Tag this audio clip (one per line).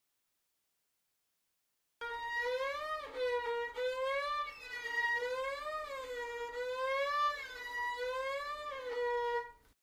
arc
non-electronic-effects
strings
violin